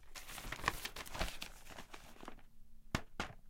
newspaper opening and pointing to a story on the page, Neumann U-87, ProTools HD